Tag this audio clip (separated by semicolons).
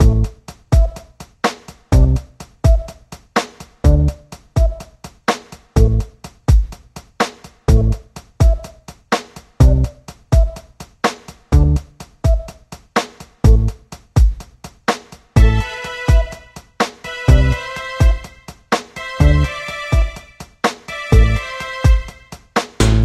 game; gamedev; gamedeveloping; games; gaming; indiedev; indiegamedev; loop; music; music-loop; Philosophical; Puzzle; sfx; Thoughtful; video-game; videogame; videogames